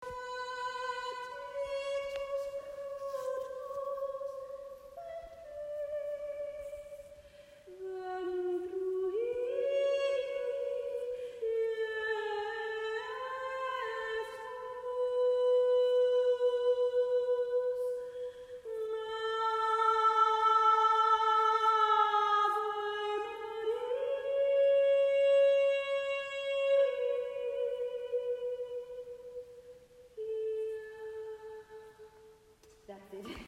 Cango Caves guide sings
The guide at the Cango Caves in the Cango Valley, South Africa, sings in the enormous cave known as the Cathedral.
Cango-caves, cave, cavern, echo